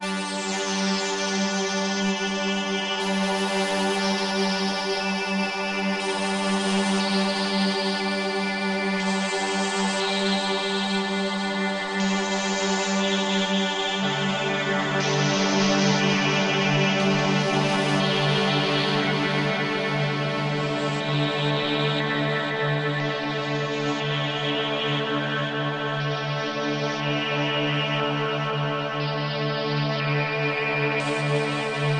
Electric orchestra 2
Recorded using soft synths and effects